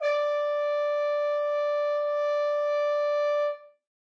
One-shot from Versilian Studios Chamber Orchestra 2: Community Edition sampling project.
Instrument family: Brass
Instrument: F Horn
Articulation: muted sustain
Note: D5
Midi note: 74
Midi velocity (center): 63
Microphone: 2x Rode NT1-A spaced pair, 1 AT Pro 37 overhead, 1 sE2200aII close
Performer: M. Oprean
brass, d5, f-horn, midi-note-74, midi-velocity-63, multisample, muted-sustain, single-note, vsco-2